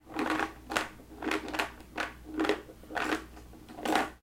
searching in trash can
trashcan, searching, garbaje